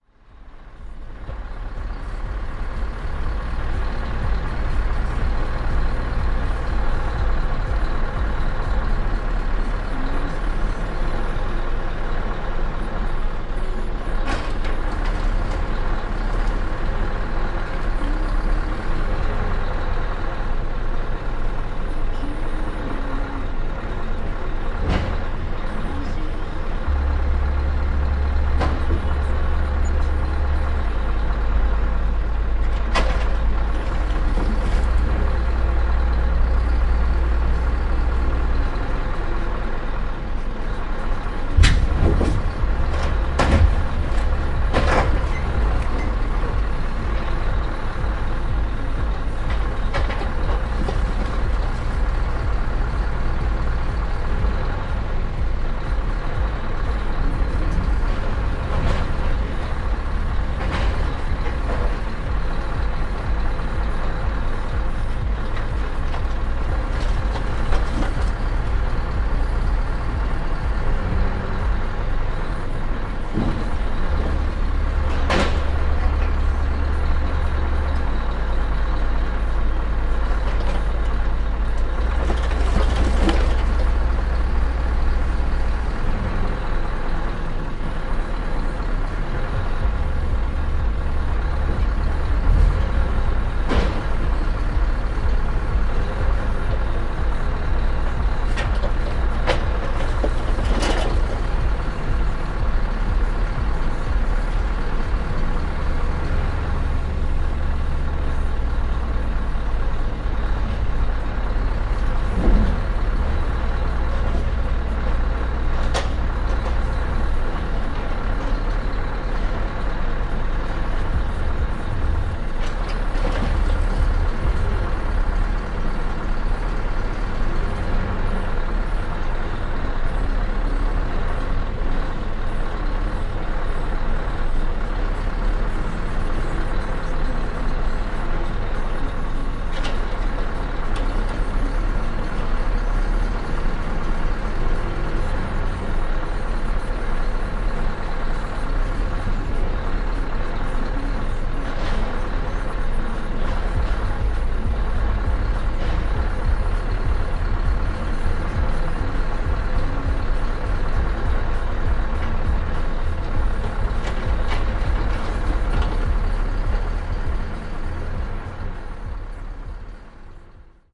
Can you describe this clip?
07.08.2015: sound recorded during loading bars of wood (HDS crane). Recorder zoom h1.